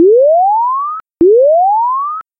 Warning sound
This is designed to be a warning siren. I was thinking of it as a sound that could be used in game development, perhaps an RPG. With a distance effect this sound noise could be used in some cool ways.